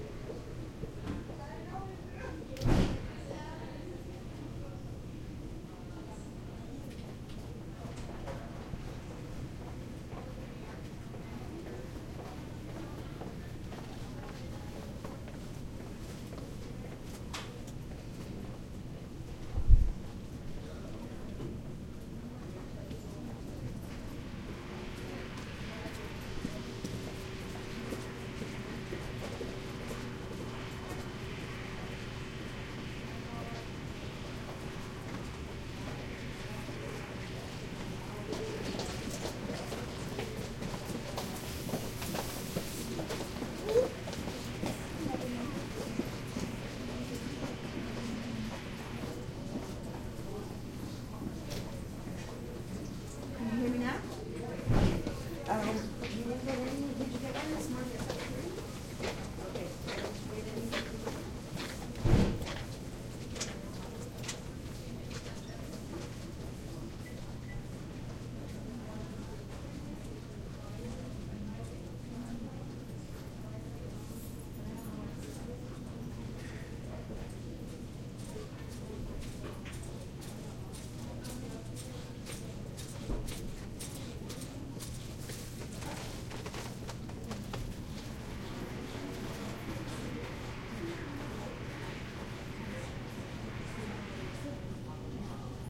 high school hallway quiet near bathroom distant voices and hand dryer and footsteps people walk past Montreal, Canada
footsteps, people, quiet